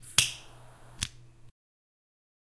Lighter SFX
lighter, game, sfx